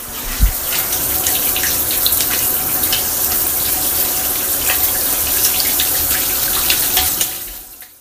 lugnie charlotte 2016 2017 RunOff
This sound represents the sound runoff. We can hear water flowing, with repercussions of the water that creates a small puddle.
Runoff, Water, Puddle